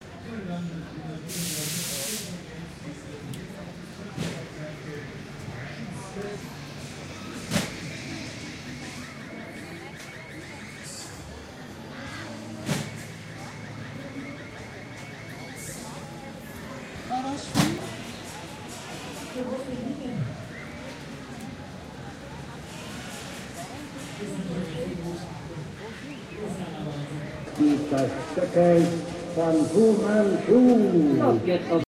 Amusement Park "Prater" in Vienna, Austria (4)
Historical amusement park "Prater" in Vienna, Austria. People talking and laughing, fun rides in the background, no music. XY Recording with Tascam DAT in Vienna, Austria, 2006
ambiance, ambience, amusement, Austria, crowd, field-recording, fun-ride, general-noise, laughing, park, people, Prater, talking, Vienna, voices